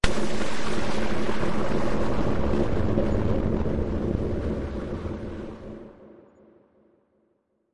Cinematic Hit, Distorted, A
(Warning: Loud)
A heavily processed recording of a piano mallet strike to sound like a distorted cinematic hit. Processed via the plugin "MHXXX - Cinematic Beat B" with some extra reverb thrown in.
An example of how you might credit is by putting this in the description/credits:
The sound was recorded using a "H6 (Mid-Side Capsule) Zoom recorder" and edited on 26th November 2017.
cinematic, hit